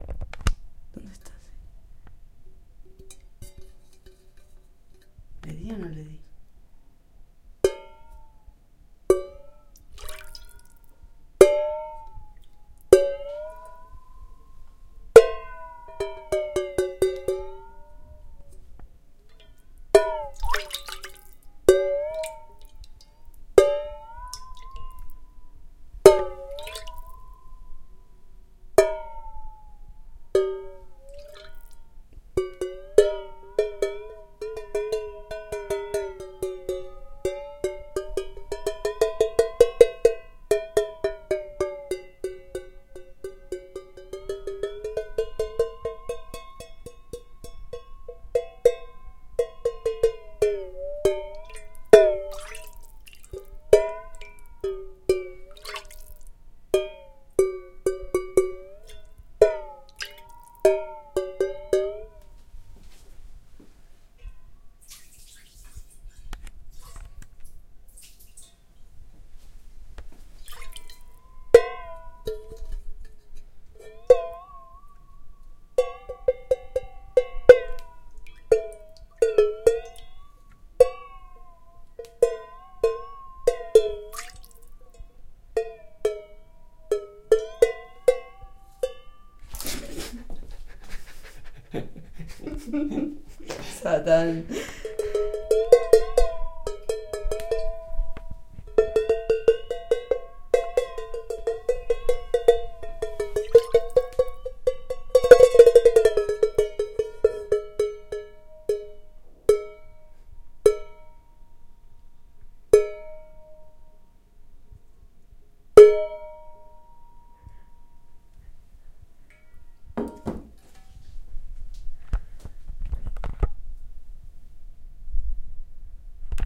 While washing up my kitchen objects I discovered this interesting effect I've been always smiling at. Put some water into a boiling pan and move it while u tickle it with some hard object.
So funny.